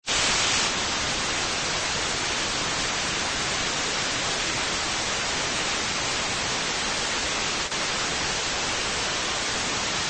No Signal
If you'd be so kind as to link me in the comments section to where you used the sound effect, I'd gladly check your project out!
Created using Audacity
White noise ^^
cshh, effect, fx, no, no-signal-sound, radio, signal, tv